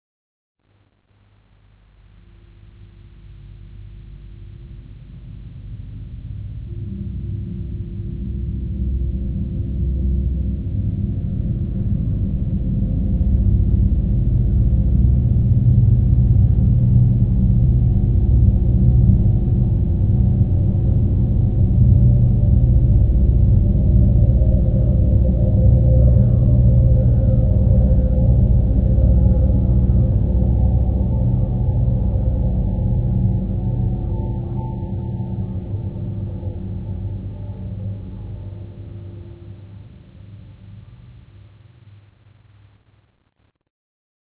Processed sounds made by me. Midi instruments from Logic Pro have been used along with effects this 5 second sound clip have been stretched and processed together with a 40 second recording made with my mobile phone. This recording was made catching a room ambience and noise. Together these sounds via Sound Hack have been used to create this new sound.
ambience, Dark, processed, sound